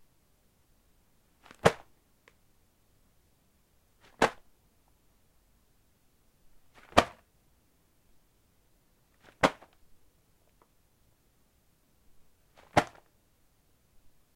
The sound of me dropping a cloth stuffed plastic bag on the floor. Recorded with a Zoom H4n.
Bag, drop, fabric, floor